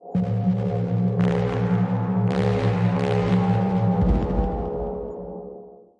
ambient 0001 1-Audio-Bunt 21
DNB, NoizDumpster, VST, ambient, breakcore, bunt, digital, drill, electronic, glitch, harsh, lesson, lo-fi, loop, noise, rekombinacje, space, square-wave, synth-percussion, synthesized, tracker